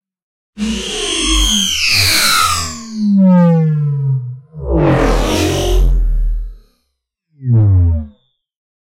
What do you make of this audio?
MULTIPLE FLY-BY'S. Outer world sound effect produced using the excellent 'KtGranulator' vst effect by Koen of smartelectronix.